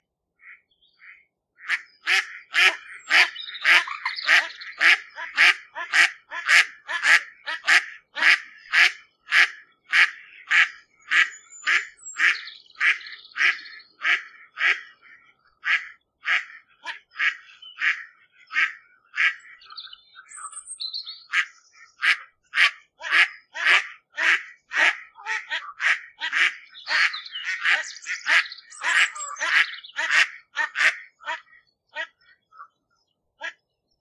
Water Birds - 1
bird, birds, duck, ducks, goose, honk, water